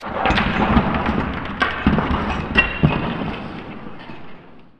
building collapse01 distant ceramic
made by recording emptying a box of usb cables and various computer spares/screws onto the floor then slowing down.. added bit of reverb
building, collapse, rubble